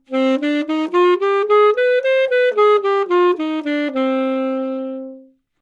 Sax Alto - C minor
Part of the Good-sounds dataset of monophonic instrumental sounds.
instrument::sax_alto
note::C
good-sounds-id::6581
mode::natural minor
Cminor, alto, scale, good-sounds, neumann-U87, sax